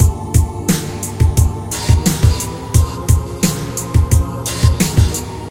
I took a chord and placed it in many different note patterns and it seemed like this was the best way to keep it sounding indifferent when you change the note its in.
175-bpm, beat, chill, dance, drum, drumloop, drum-n-bass, pad, phase, progression